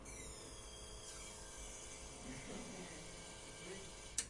sonido maquinas cafe
sounds, coffe, machine